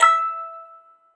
metal cracktoy crank-toy toy childs-toy musicbox
musicbox, toy, crank-toy, childs-toy, metal, cracktoy